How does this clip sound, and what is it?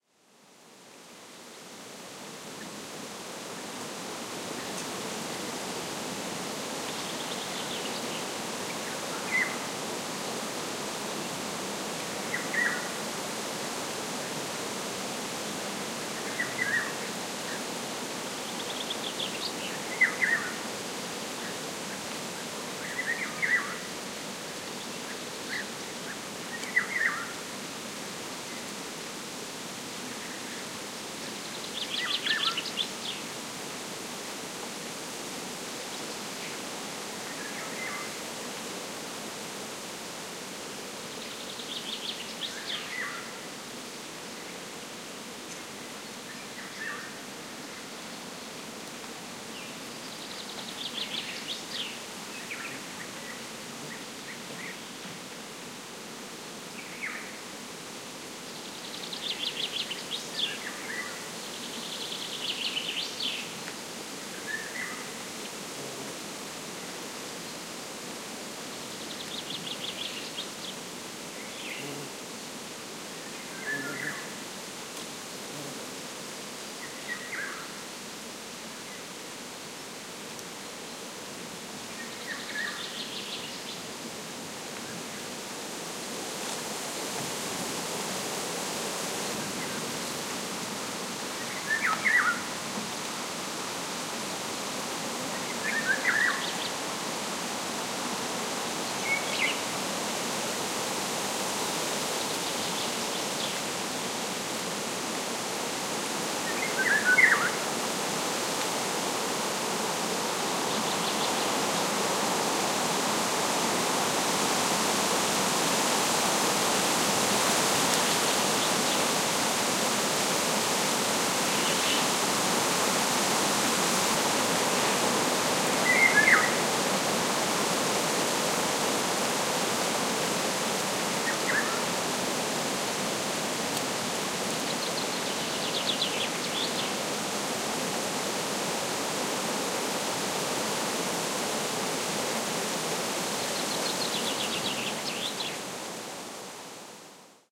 20120609 windy golden oriole 03
Golden-oriole callings, with noise of strong wind on trees. Recorded at the Ribetehilos site, Doñana National Park (Andalucia, S Spain)
Donana, field-recording, forest, golden-oriole, Mediterranean, oriolus, Spain, spring, wind